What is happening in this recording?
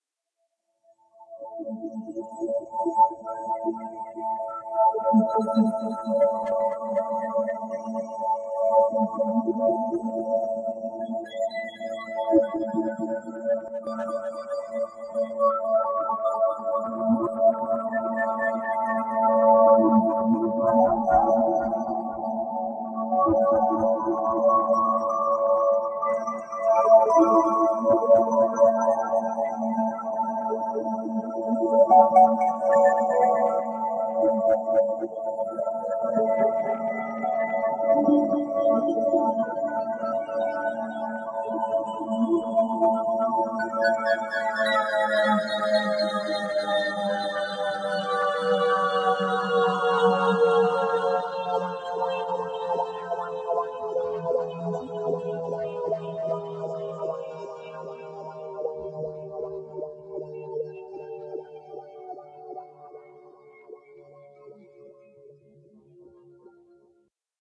Electric guitar with a gliding time stretch.
contraction, dilation, electric, filtering, guitar, heavy, noise, outer, sci-fi, space